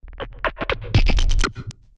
glicz 0001 1-Audio-Bunt 11
breakcore, bunt, digital, drill, electronic, glitch, harsh, lesson, lo-fi, noise, NoizDumpster, rekombinacje, square-wave, synthesized, synth-percussion, tracker